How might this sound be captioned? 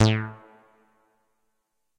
MOOG BASS SPACE ECHO A
moog minitaur bass roland space echo
minitaur, moog, bass, space, roland, echo